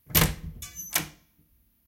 Opening a lightweight wooden door with metal lock in a student flat. Recorded with Voice Record Pro on Samsung Galaxy S8 smartphone and edited in Adobe Audition.